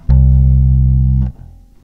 Bass Guitar C2 [RAW]
Some random notes.
Recorded into Cubase using a 4-string Squier Jazz Bass and a Behringer ULTRAGAIN DIGITAL ADA8200 converter.
Cut in ocenaudio.
It's always nice to hear what projects you use these sounds for.